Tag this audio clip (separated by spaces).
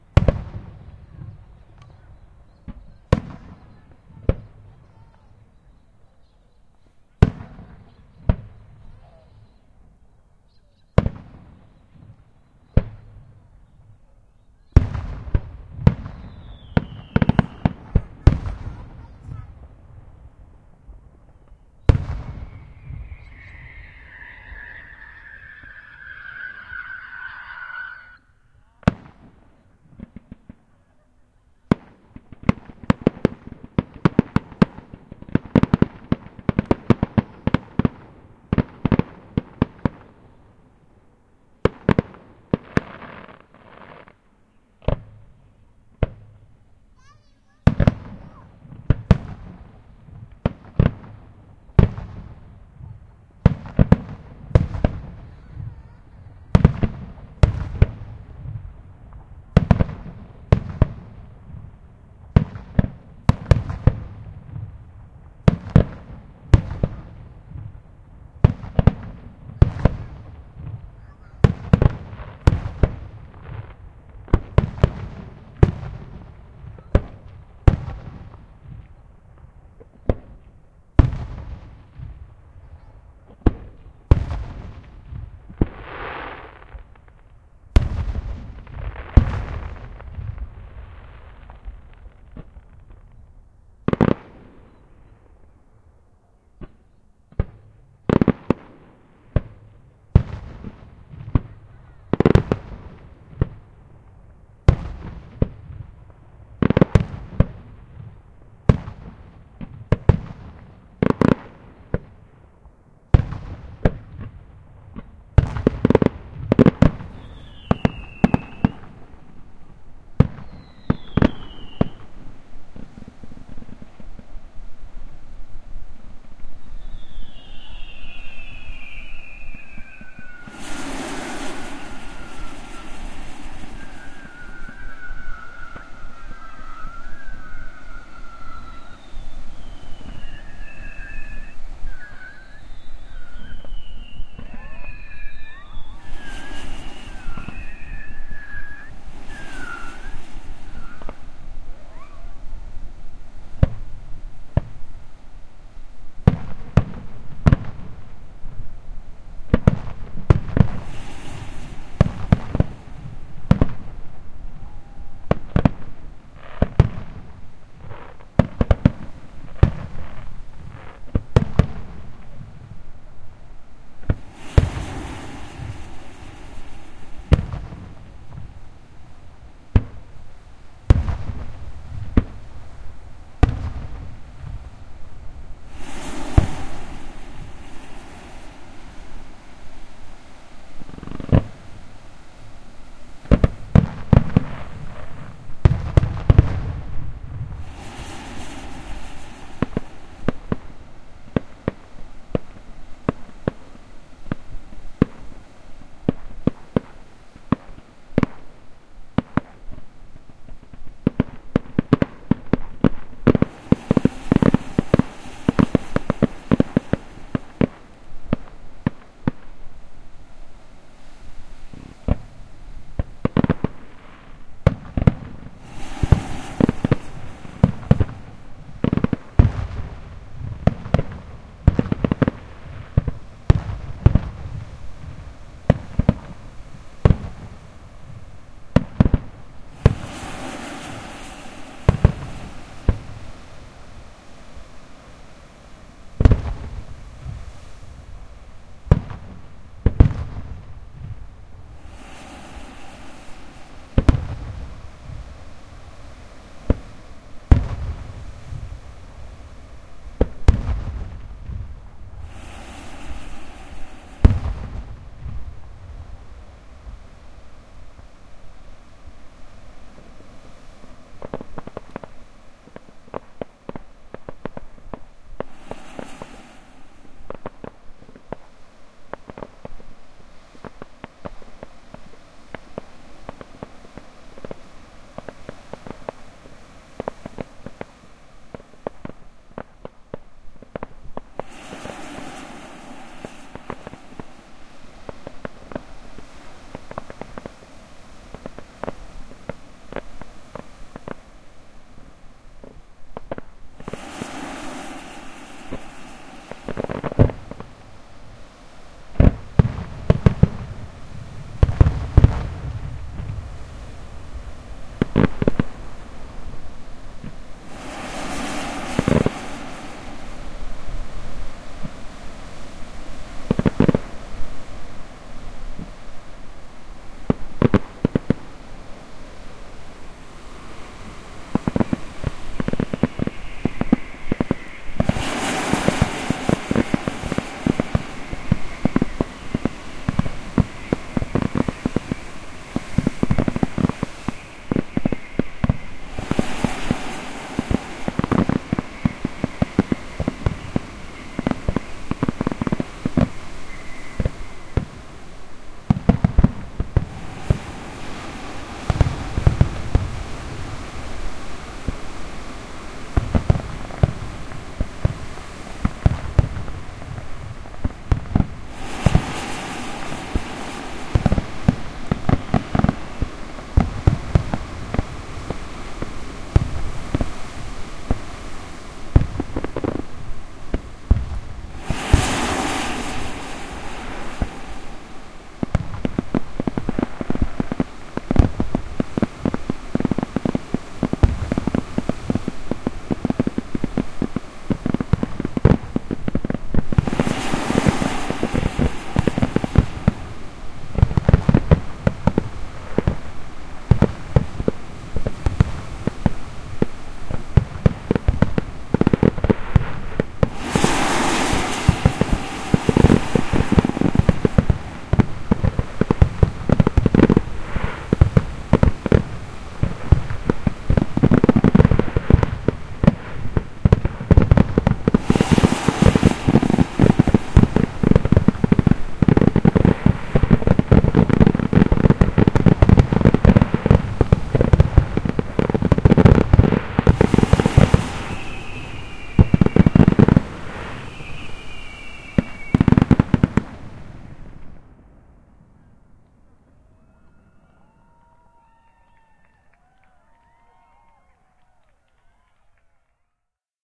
field hole recording works new blow year fire